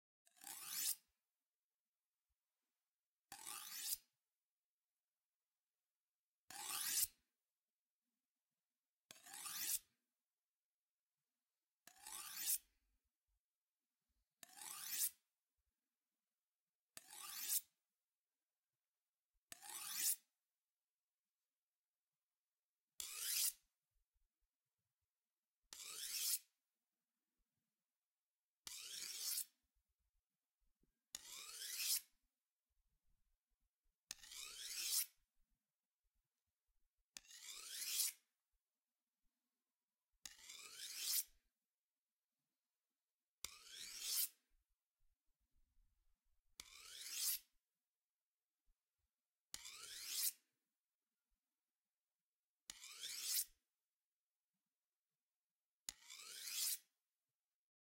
Sharpen Knife
The sound of slowly sharpening a large kitchen knife
blade, Knife, metal, scrape, sharp, sharpen, sharpening, slice